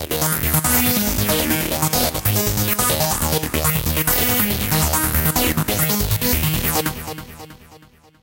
Psy Trance Loop 140 Bpm 03
The loop is made in fl studio a long time ago
Trance, Loop, psytrance, goa